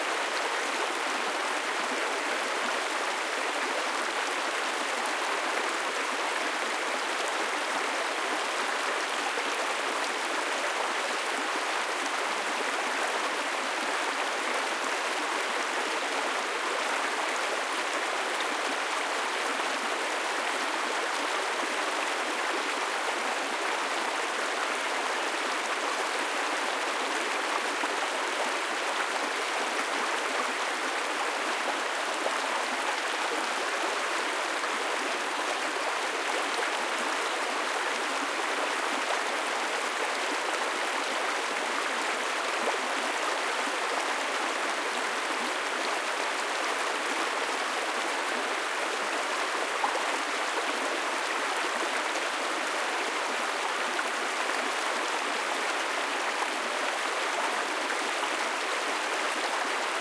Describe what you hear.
Collection of 3 places of a smaller river, sorted from slow/quiet to fast/loud.
each spot has 3 perspectives: close, semi close, and distant.
recorded with the M/S capsule of a Zoom H6, so it is mono compatible.